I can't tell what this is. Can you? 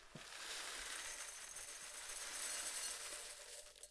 Recording of rice puff cereal being poured into an empty ceramic bowl.
bowl, breakfast, cereal, pouring, pour